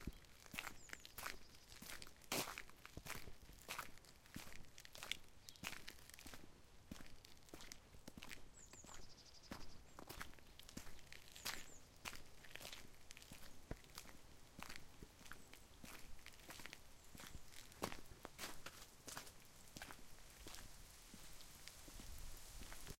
Footsteps in gravel. In the backround some birds.